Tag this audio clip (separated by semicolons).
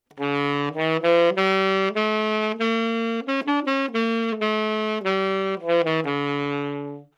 sax; scale; good-sounds; tenor; neumann-U87